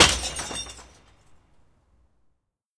Glass Shatter 3
Throwing away glass trash.
smash, shatter, break, shards, bin, drop, glass, crunch, recycling, crack, bottle